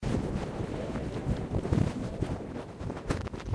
wind in a stove pipe (different again)
hiss, field-recording, noise, wind, rustle, stove-pipe